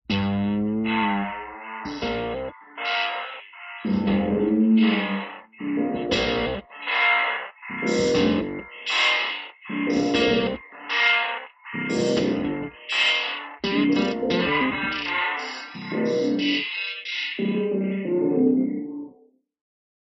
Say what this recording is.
mangled guitars 1
Guitar tracked thru multiple fx recorded in logic 8 with a sm 57
distorted, guitars, mangled, processed, strange